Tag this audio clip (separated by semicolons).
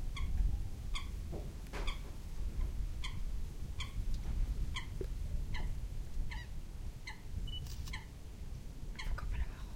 Coot; Deltasona; el-prat; fotja; Llobregat; natura